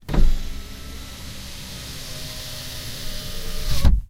Car Window Up
Opel Astra AH electric window closing, recorded from inside the car.
car, field-recording, open, power-window, down, binaural, electric-window, automotive, electric-motor, sliding, window